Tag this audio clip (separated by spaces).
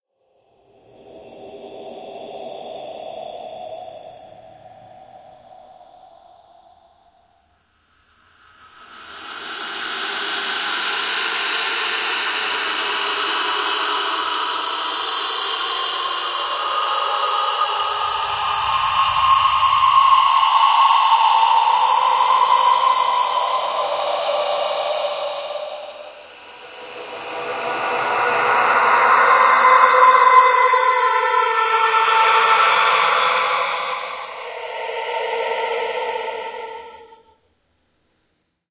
creepy dark eerie film game ghost halloween haunted horror movie nightmare phantom place sinister spooky